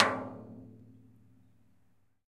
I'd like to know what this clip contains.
hit - metallic - natural gas tank 02
Hitting a metal natural gas tank with a wooden rod.